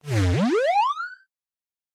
A longer synth glide.